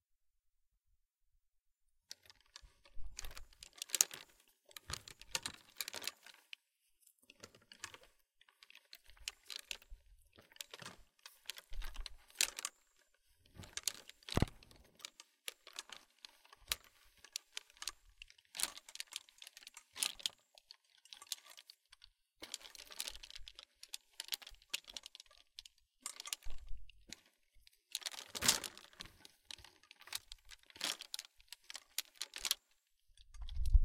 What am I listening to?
Golf Bag 1
The sound of golf clubs rattling around in a golf bag. This is better than take 2. Recorded on a DR07 mkII in Southwest Florida.
If you can, please share the project you used this in.
bag, club, clubs, driver, golf, rattle, swing, swish